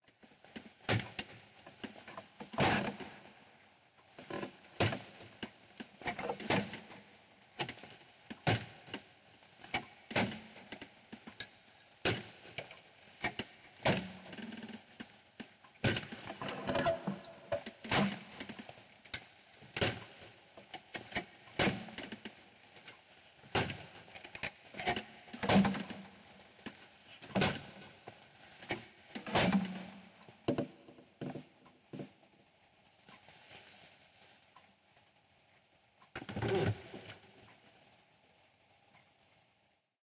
stepping down into the dungeon is a sample of someone stepping or stomping down into the dungeon and opening the dungeon door. It was recorded by stomping around the kitchen and opening the fridge door.
door, dungeon, fridge, step, stepping, stomp, stomping